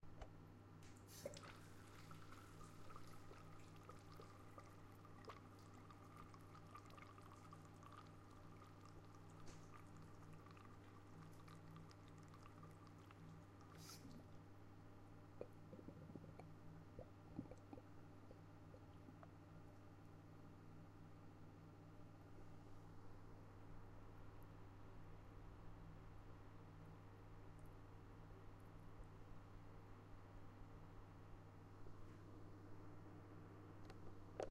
I ran the bath and let the water drain out.
bath, drain, running